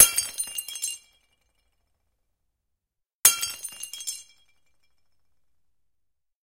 Breaking glass 1
A glass being dropped, breaking on impact.
Recorded with:
Zoom H4n op 120° XY Stereo setup
Octava MK-012 ORTF Stereo setup
The recordings are in this order.